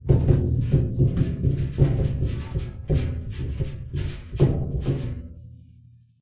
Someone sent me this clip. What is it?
ductrustle dark
Shaking a large thin metal box.
impact, dark, plate, duct, metal, shake, rustle